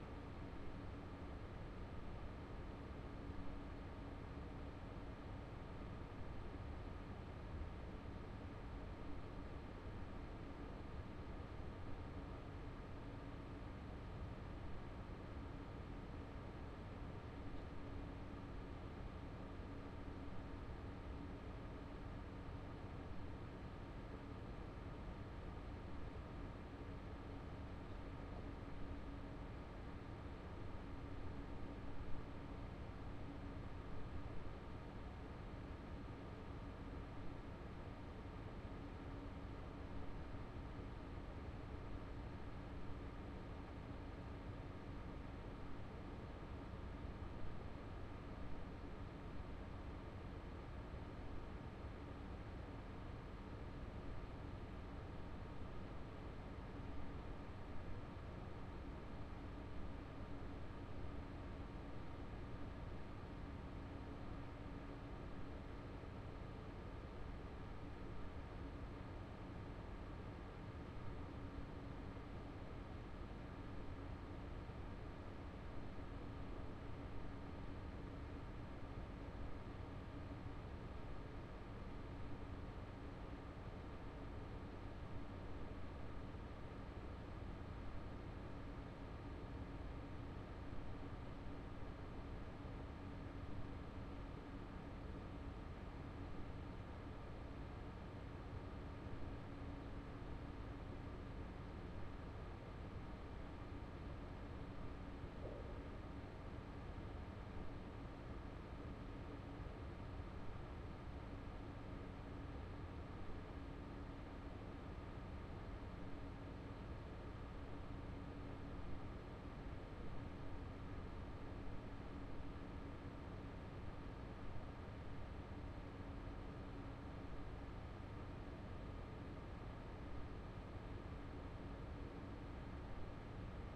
Room Tone Office Industrial Ambience 01
Room, Tone